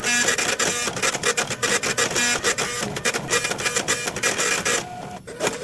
epson receipt printer3
this epson m188b printer is found in Manchester INternational Airport at a store in Terminal 3. It is printing out a receipt.
This can be used for a receipt printer, a kitchen printer, a ticket printer, a small dot matrix printer or a game score counter.
Recorded on Ethan's Iphone.
android, business, computer, computer-printer, dot-matrix, electrical, electromechanical, game, kitchen-printer, machine, mechanical, point-of-sale, print, printer, printing, receipt, robot, robotic, slip-printer, technology, ticker